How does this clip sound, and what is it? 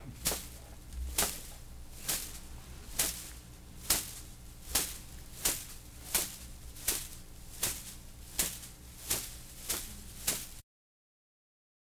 Hitting a man with the branch o a palm tree